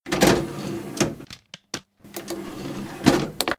disc from case to cd player and press play 2
Taking CD out from jewel case, then put it into CD-player, closing the tray and press play. Recorded with Olympus LS 10.
player, tray, cd, case, handling